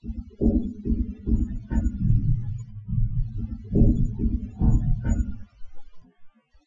Created from this sound:
Seamless loop created on my second session trying to find nice useable loops in this sample.
Loops seamlessly at 143.66 BMP.